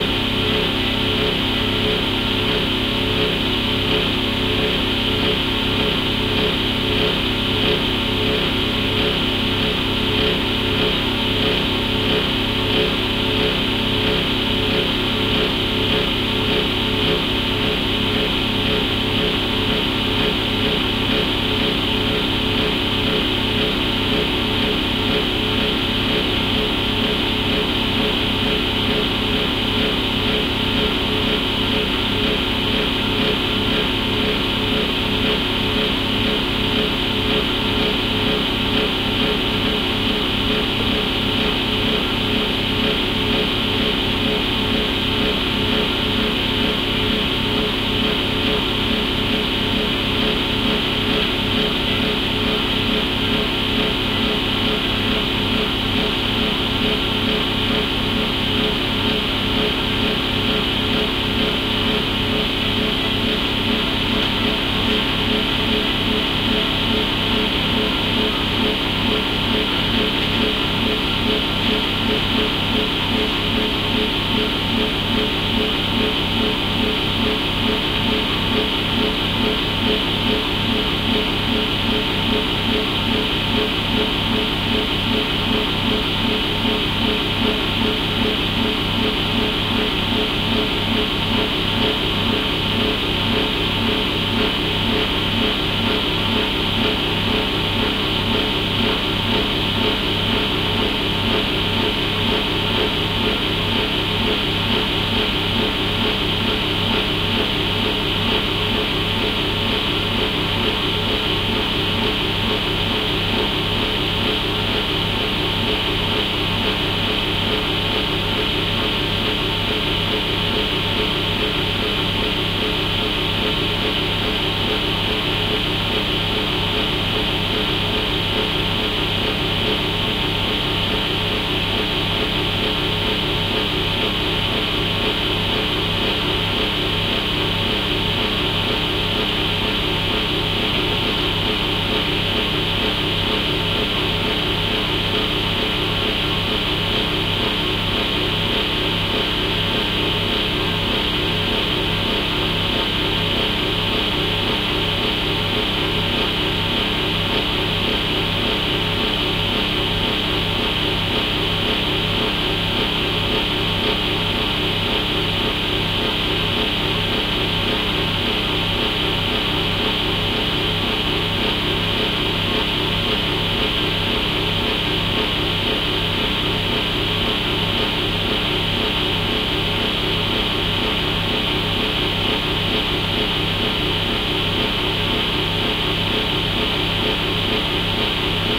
Fan Oven Raw
A recording of a fan oven. DIY Panasonic WM-61A hydrophones used as contact mics > FEL battery pre-amp > Zoom H2 line-in.
fan-oven, machine